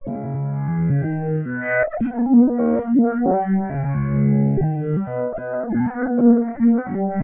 Robot RIff
133bpm piano loop manipulated by aliens from a dystopian future
robot, riff, bpm, alien, dubstep, 133bpm, 133